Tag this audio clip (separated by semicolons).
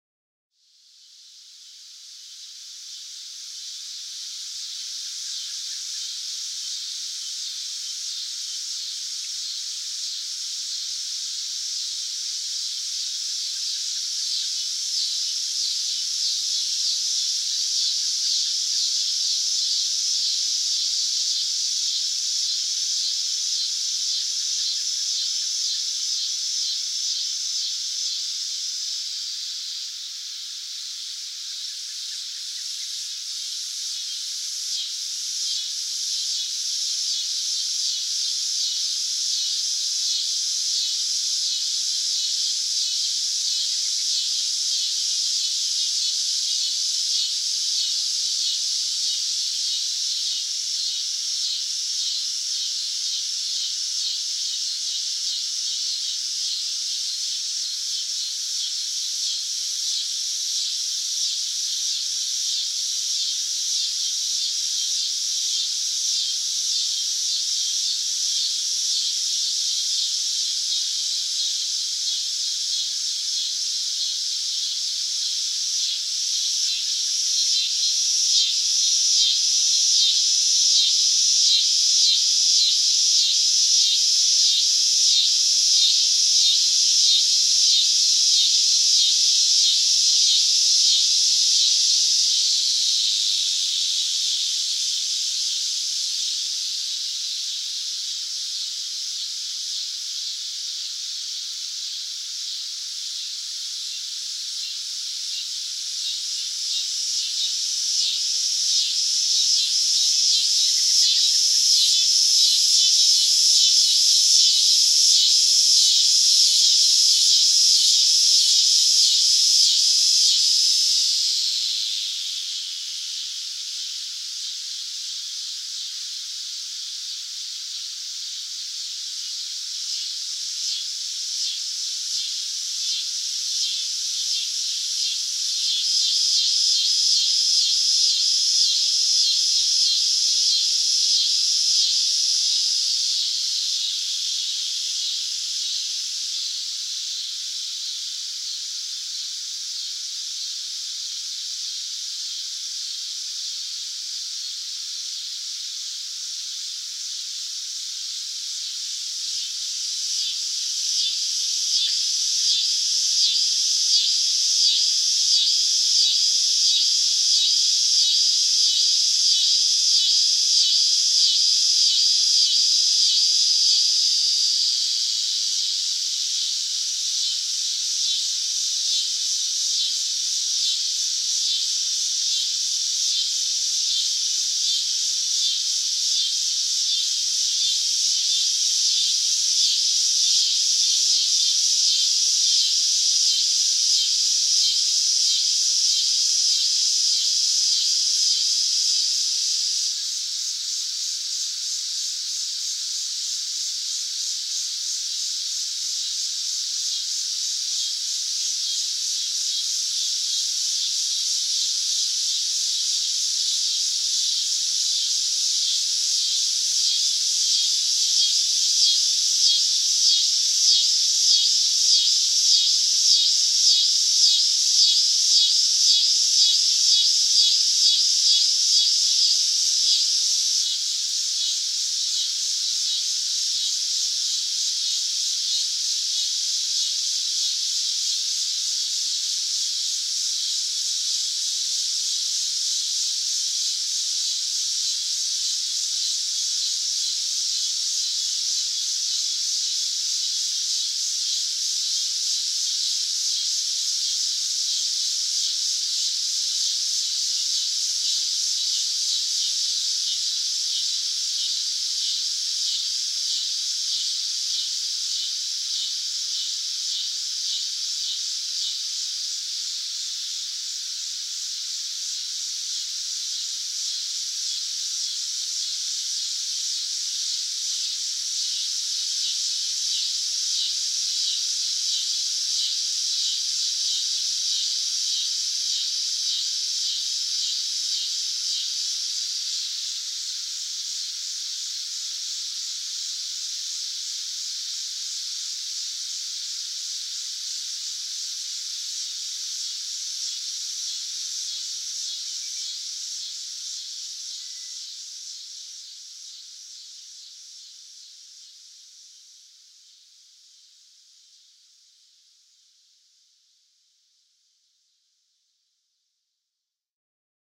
insects; field-recording; nature; summer; cicadas; locusts